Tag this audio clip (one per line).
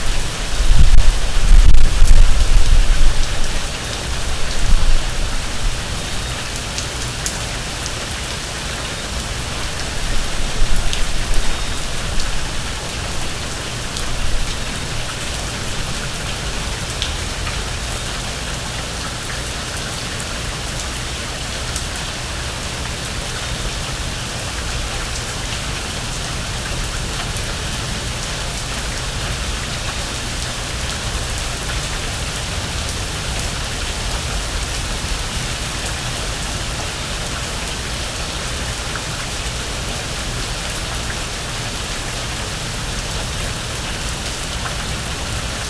ambient
long
loop
ambiance
water